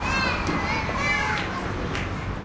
In this record you can hear the ambient of some children playing.
SonicEnsemble, ambient, children, play, playing, talk, talking